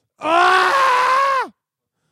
male scream Bram OEAAH
Bram screams OEAAH